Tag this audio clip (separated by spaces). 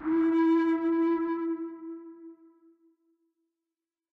spook yes spooked